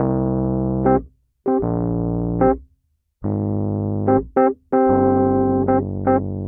rhodes loop 2
Rhodes loop @ ~74BPM recorded direct into Focusrite interface.
74bpm, rhodes